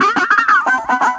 Riff Malfunction 03
Glitched riff from a circuit bent toy guitar
Malfunction, Circuit-Bent, Circuit-Bending, Glitch